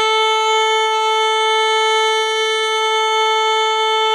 Violin open E string
note, string, violin